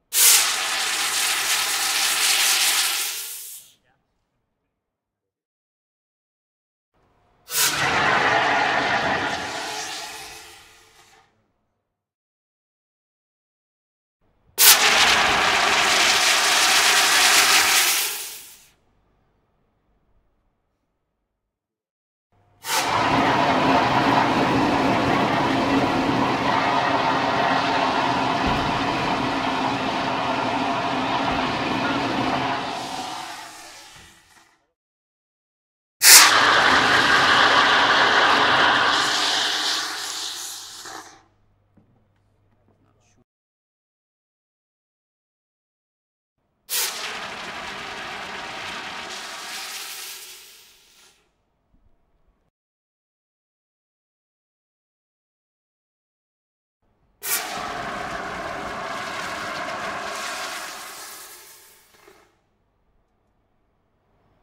water gurgle from air release compressor dive tank or urinal flush
from, flush, water, or, tank, dive, gurgle, compressor, release, urinal, air